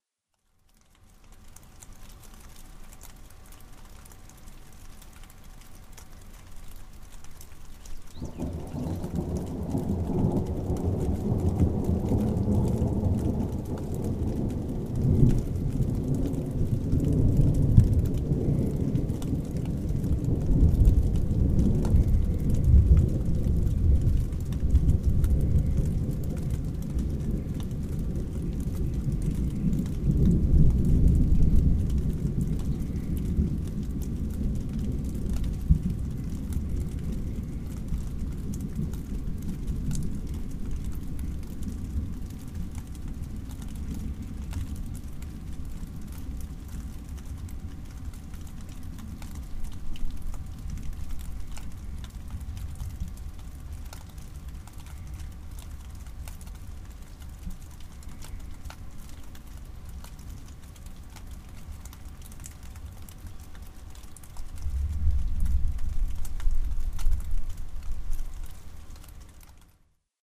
Recorded during a summer thunder storm with a t-bone SC400 condenser mic.
thunder-roll, thunder, thunder-storm, rain